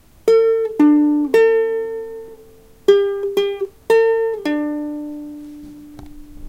uke single notes
A recording of several single notes on the ukelele. Noisy, not a totally clean recording either (some bleed from a C string that I didn't intend to touch).
This sound was originally created for the Coursera course "Audio Signal Processing for Music Applications." I recorded this sound myself with a Zoom H2 microphone and a Kala classical ukelele.